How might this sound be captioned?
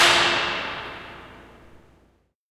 RevHit short03
Stereo ORTF recorded with a pair of AKG C451B and a Zoom H4.
It was recorded hitting different metal stuffs in the abandoned Staub Factory in France.
This is part of a pack entirely cleaned and mastered.